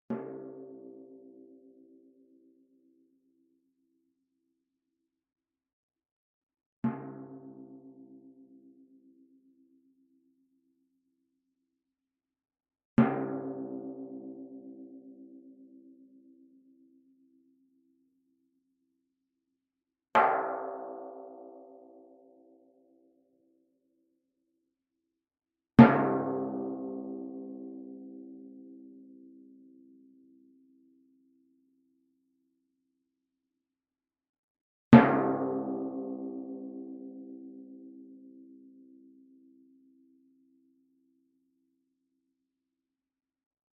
timpano, 64 cm diameter, tuned approximately to C#.
played with a yarn mallet, on the very edge of the drum head.
drum, flickr, percussion, timpani